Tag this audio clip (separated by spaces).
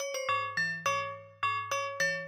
sample electronic loop cubase music-box